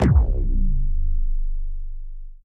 A bassy, wobbly sound effect that can be used for sci-fi weapons and such, created by overlapping pitch-shifted bass synthesizers and adding distortion and drums.